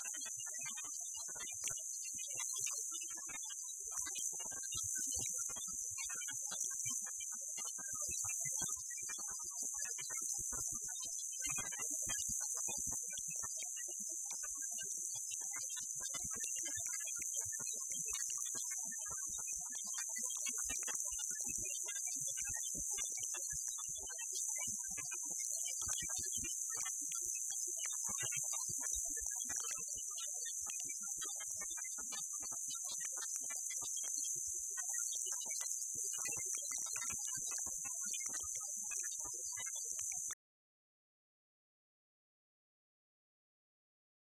Radio interference from an unknown source
distortion, Interference, Radio